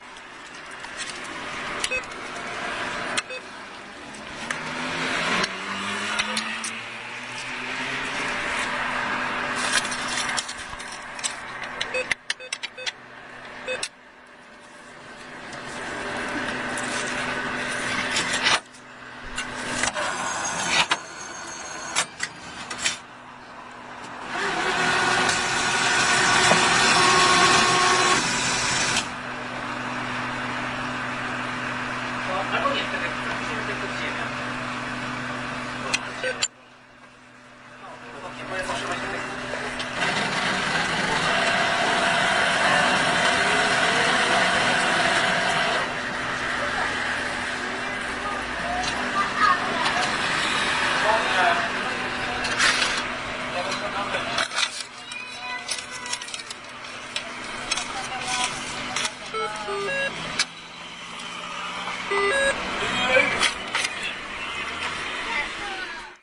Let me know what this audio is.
20.08.09: the wall mounted cash deposit machine. Sw. Marcin street in Poznan.
cash, dispenser, machine, money, street